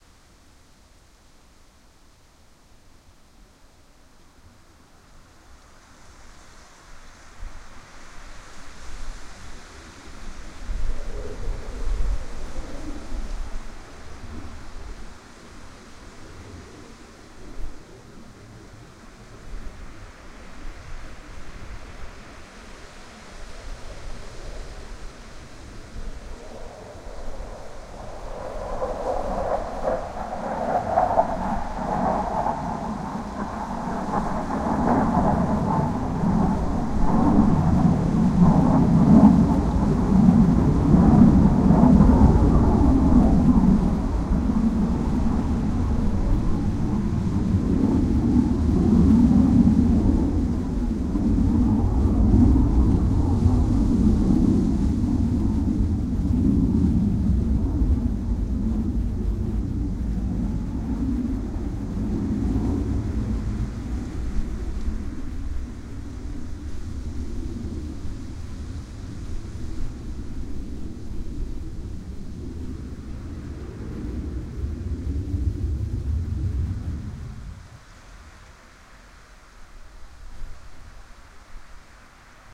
Fighter jet at night 06
File 6 / 10. F-16 Fighting Falcon flying low after midnight near Varde, in Denmark. F-16 pilots are practising dogfight and night flying all night through. This was cut out of a two hour long recording, there's a lot of wind at some points, but one definitely can hear the jets clearly. This lets you hear how it sounds when an F-16 passes by almost exactly over you. There's a good doppler effect and a nice depth to this recording.
Recorded with a TSM PR1 portable digital recorder, with external stereo microphones. Edited in Audacity 1.3.5-beta on ubuntu 8.04.2 linux.